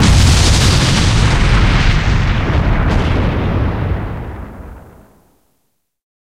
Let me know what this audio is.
kl ex1
Some explosion sounds I mixed up from various free web sounds i.a.
explosion, far, heavy, impact, shockwave